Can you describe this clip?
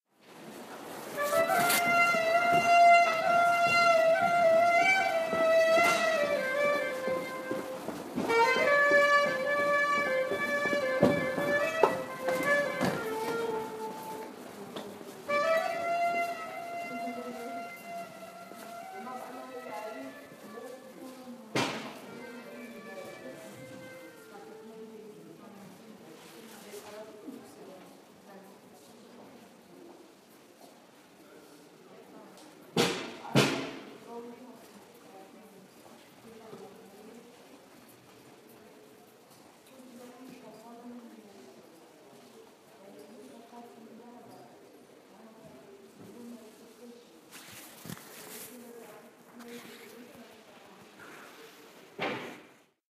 flute in subway
passing a flute player in the berlin subway
subway, going, flute, by, passing